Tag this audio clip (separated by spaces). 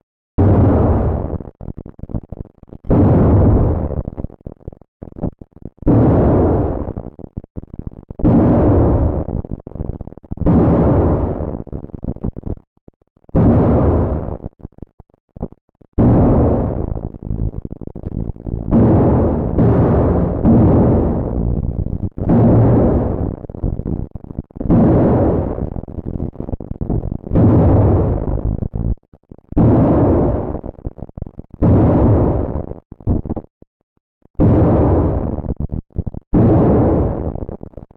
cinematic impact distortion bang horror percussion trashed strike processed explosion pop smack sci-fi drop pow boom shield saturated hit scary percussive industrial